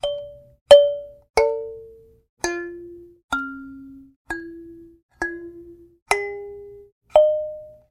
african finger piano
All the notes on an un-tuned finger piano gourd.
detuned, ding, metal